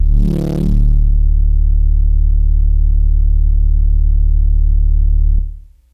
TX81z Home Base D1
This is a raw wave multi-sample created with a Yamaha TX81z FM synthesizer. It is a dirty sort of bass sound which is gritty at the start and gets rounder at the end. The file is looped correctly so it will play in your favorite sampler/sample player. The filename contains which root note it should be assigned to. This is primarily a bass sound with notes from C1 to D2.